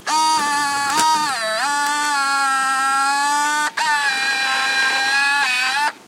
ELECTRIC MOTOR
Sounds of the eject servos of my old Sony DCR PC-100. Useful for building "electric locks", or "robot arm moving" etc.
machine, electric, servo, mechanical, technical, robot, robotic, small, motor